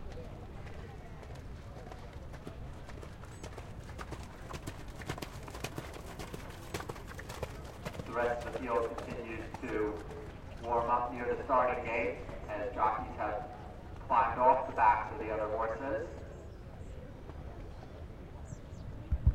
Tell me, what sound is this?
This is the sound of a single horse coming back to the paddock for a jockey change. It is relatively quiet here.
horse-race, race, single, horse, horse-racing